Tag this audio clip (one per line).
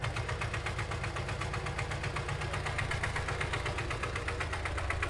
diesel
engine
idle
loop